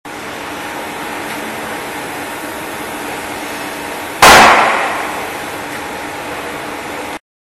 Sound of hydraulic lifter (Jungheinrich) go back - down.